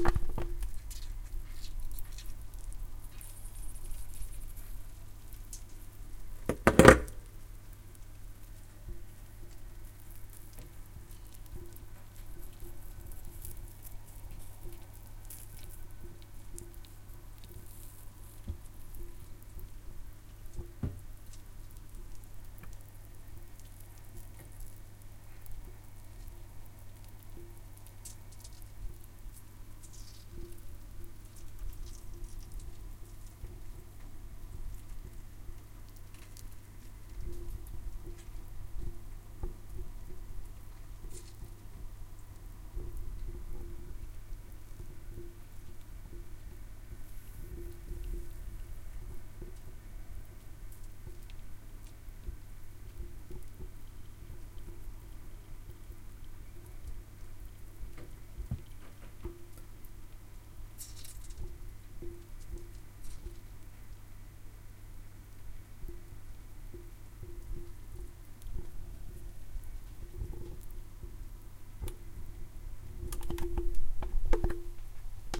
Close up recoding of something frying slowly.